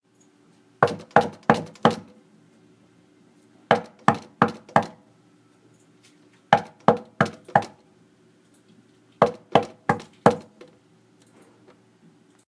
Knocking On Door
The sound of knuckles knocking on a wooden door.
bang, door, field-recording, hit, knock, knocking, wood, wood-door, wooden, wooden-door